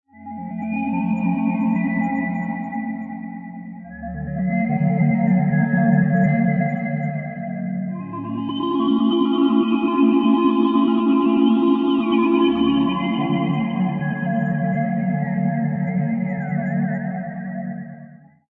This sample was created in Ableton Live 9 using various synths and layering.